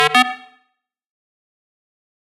UI Wrong button4
game button ui menu click option select switch interface
menu, game, option, switch, click, ui, button, select, interface